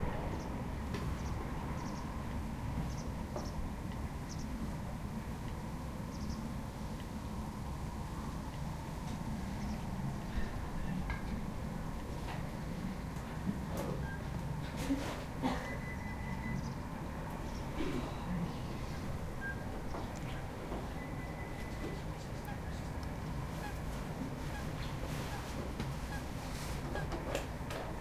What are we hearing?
Ocell Silvia Paula
We recorded a bird of the delta of Llobregat. Recorded with a Zoom h1 recorder.
field-recording, birdsong, Deltasona, birds, bird, el-prat, Llobregat, forest, nature